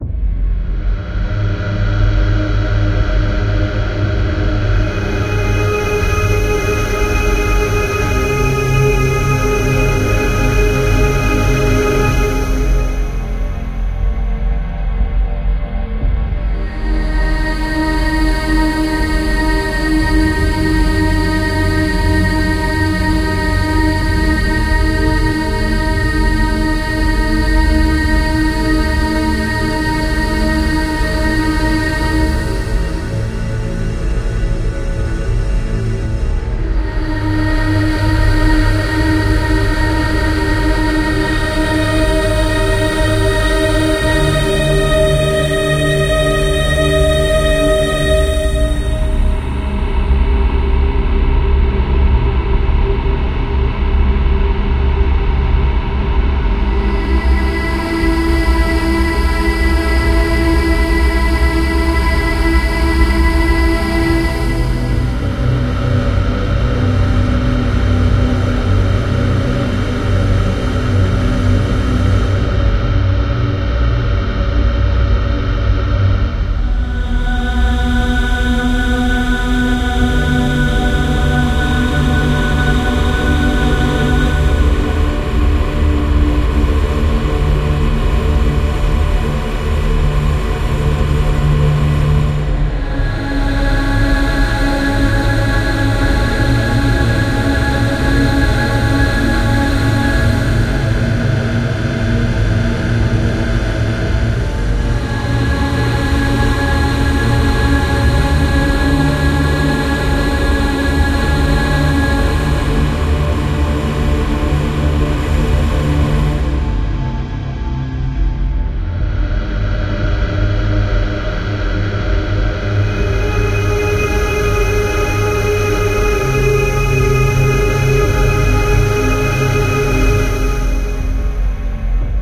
Dark Sahara - Dark Thriller Sci-fi Myst Horror Fantasy Atmo Mood Cinematic